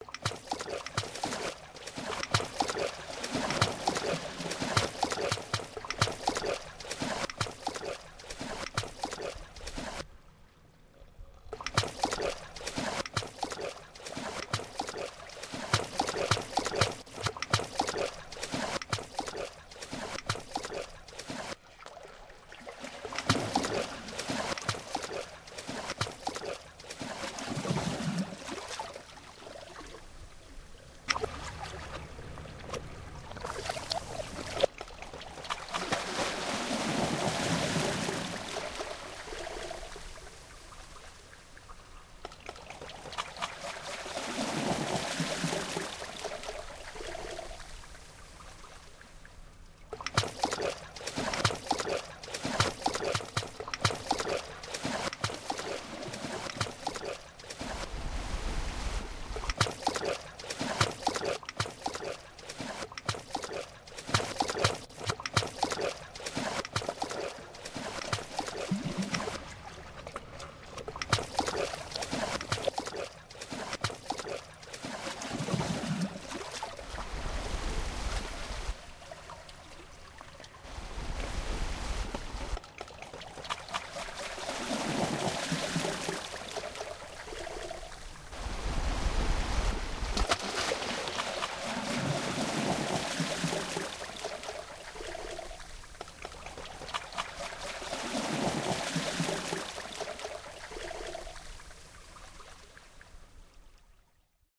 This is a short extract from a sound installation 'The Ocean Misses a Beat' commissioned for a festival in Plymouth, UK and subsequently presented in Chester as part of the 'Up the Wall' Festival. It features recordings of the sea I made at Heybrook Bay in Devon.